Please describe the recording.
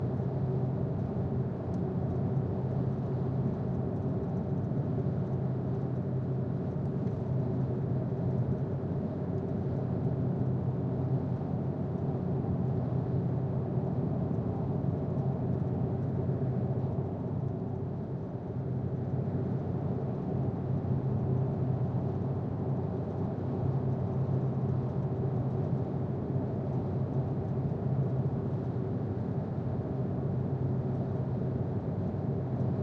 Vehicle interior in motion repeatable
Seamless and repeatable sound of traveling on the road inside a vehicle wither it be a car, a truck or even an aircraft.
asmr, automobile, car, drive, driving, engine, inside, interior, motion, motor, travel, truck, vehicle